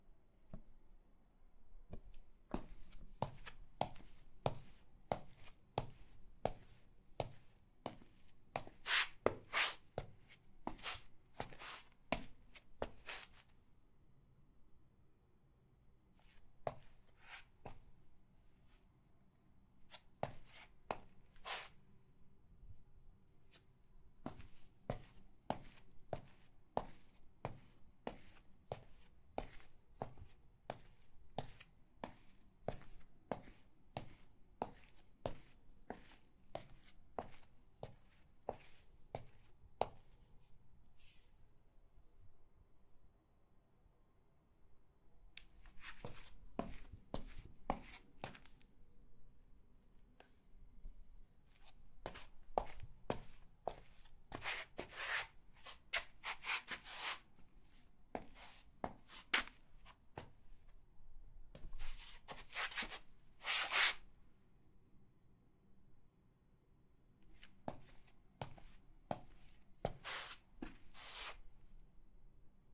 FOOTSTEP SNEAKERS TILES
I recorded my footsteps with Zoom H64n